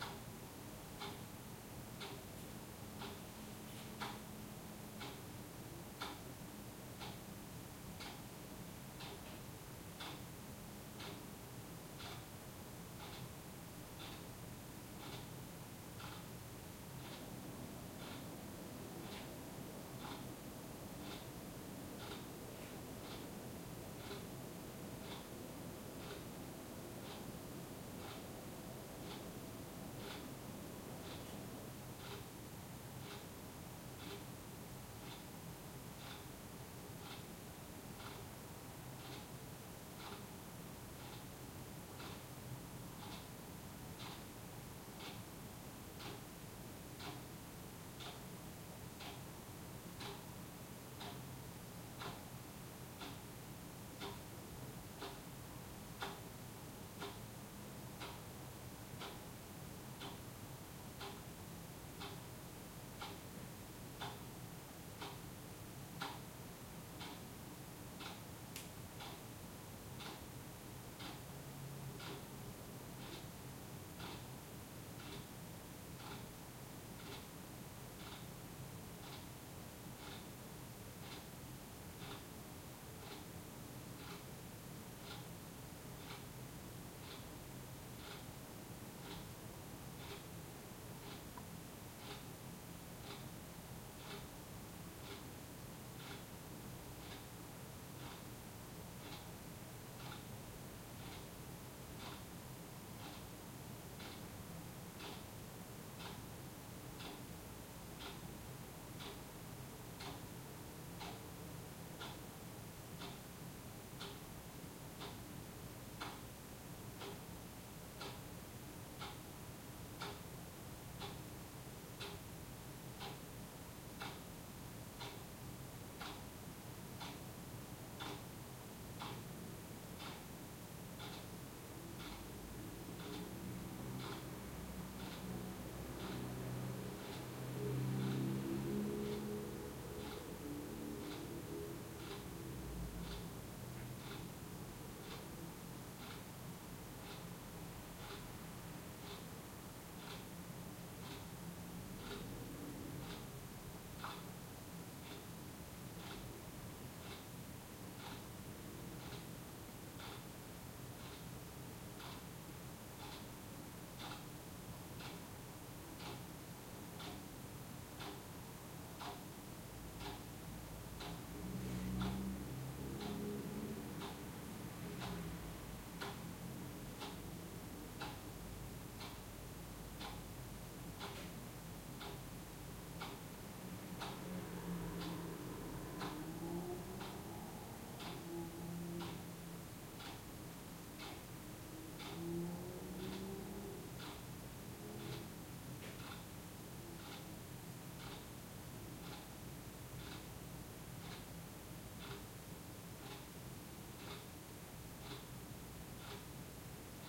170720 SmallAppartment Kitchen F
4ch-surround field recording of a kitchen in a medium sized urban North European apartment building at night. A clock is ticking in the rear of the recorder position, small noises by drains and kitchen appliances can be heard over the backdrop of soft city noises coming from the open window in front. Some sporadic traffic can also be heard.
Recorded with a Zoom H2N. These are the FRONT channels of a 4ch surround recording. Mics set to 90° dispersion.
surround, field-recording, backdrop, atmo, quiet, room, city, ambient, clock, urban, trafic, rooms, ambience, night, kitchen, tick, neutral